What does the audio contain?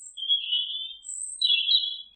Bird Noise - 1
tweet, twitter, chirping, chirp, pigeon, bird, song, rural, woods, birds